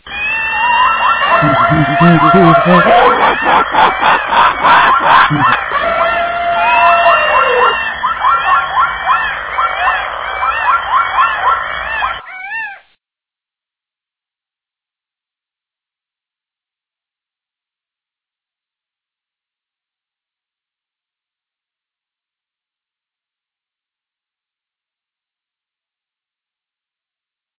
jungle sounds and noise bawana